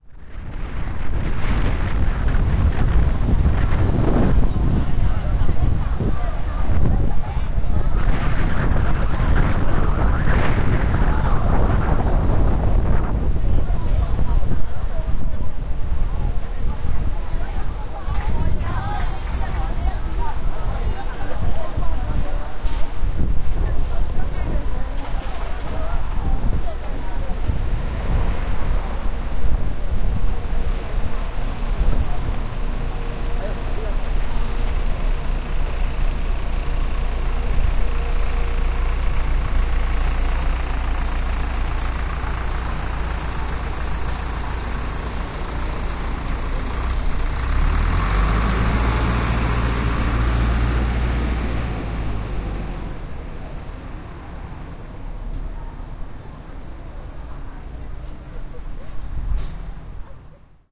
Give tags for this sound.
field-recording; ambience; soundscape; atmosphere; macao

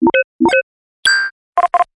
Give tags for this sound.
80s; computer; game; robotic

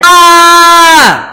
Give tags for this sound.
scream; meme; funny

